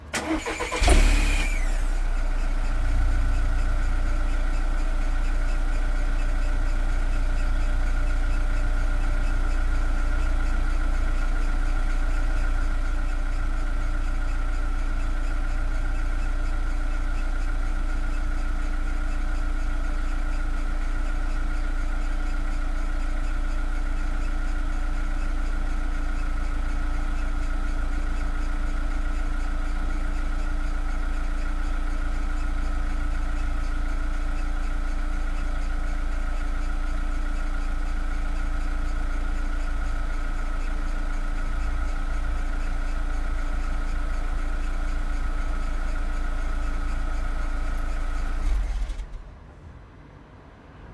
FR- Car engine, idle, exhaust
car engine exhaust full mix including burbles from exhaust distant engine sounds
car, engine, exhaust, idle, motor, start